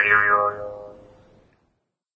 jews harp 15
A pluck on a Jew's harp.
Recorded late at night in my bedroom on a Samsung mp3 player.
Unfortunately the recording have a lot less warmth to it than the instrument has in reality.
15 of 15
drone, guimbarde, jaw-harp, jews-harp, mouth-harp, ozark-harp, trump